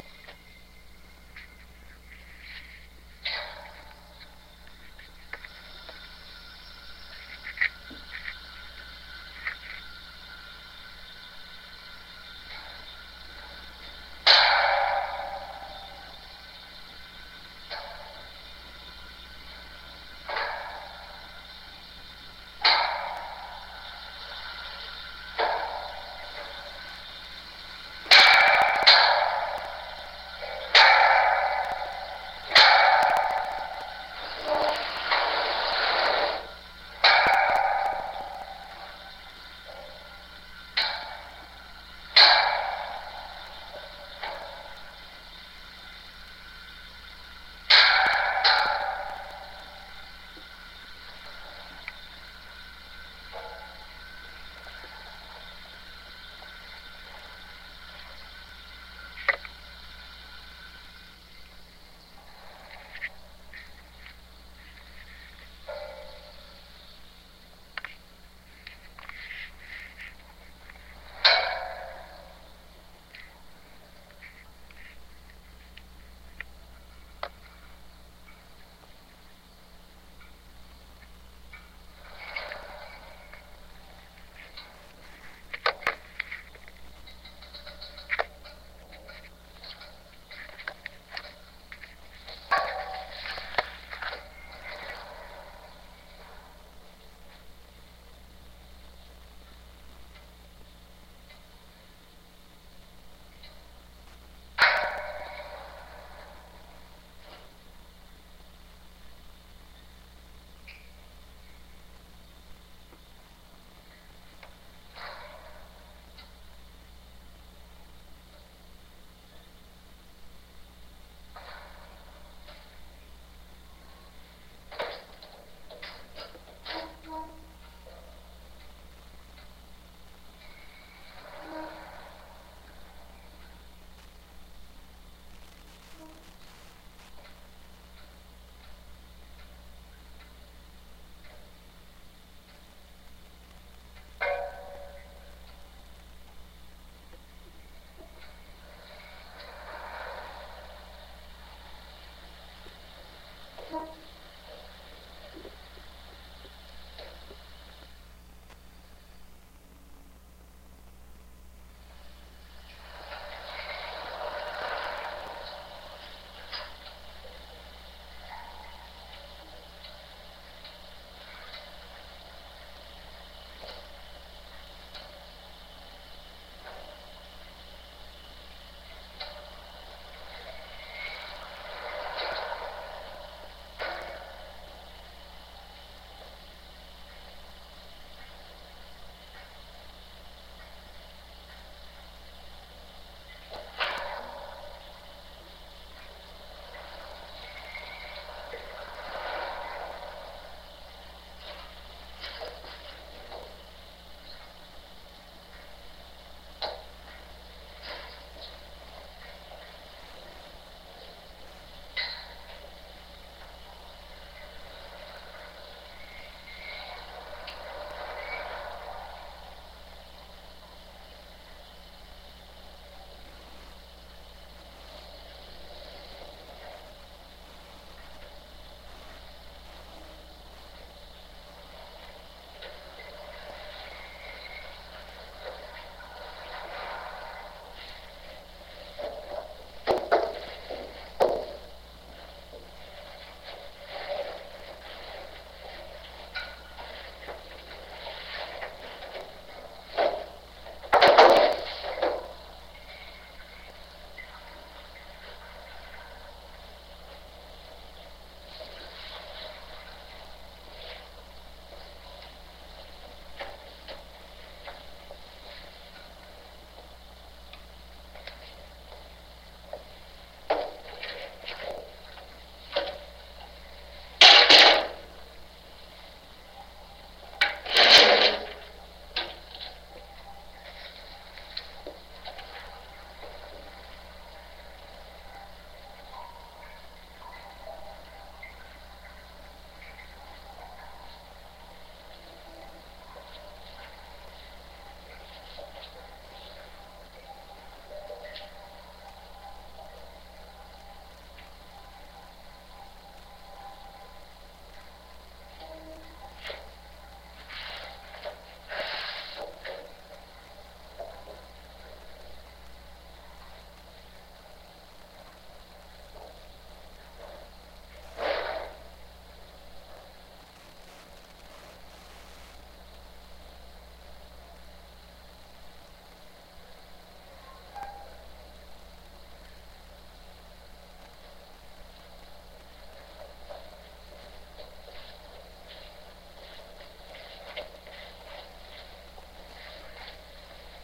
School Heater
The heaters at my school make some very good spontaneous noises. This is a four minute recording I made after school in the art class room. Lots of clangs, bangs, pops, cracks, and fizzles.